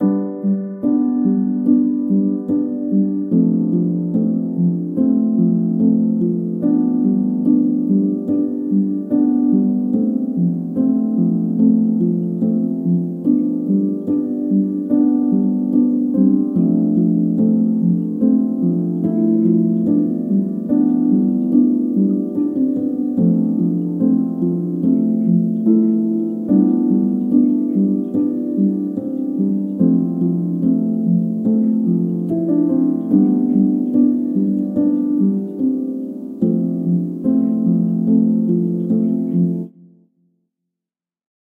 piano background 2
Just some quick piano background for the videos, I originally used it to put on a video of some DIY stuff
Not so very proud of it, but I think that will do if using in a simple projects.
That's why I'm giving it away for free. :) hope that helps!